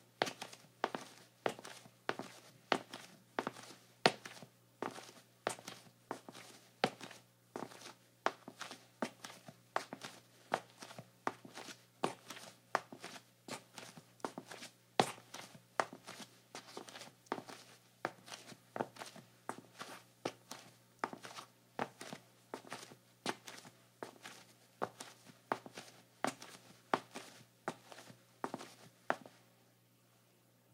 01-20 Footsteps, Tile, Slippers, Slow Pace
Slippers on tile, slow pace
walk, footsteps, linoleum, male, slow, footstep, slippers, tile